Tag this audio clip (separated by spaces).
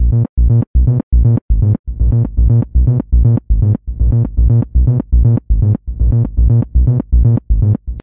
acid bass dance electronica trance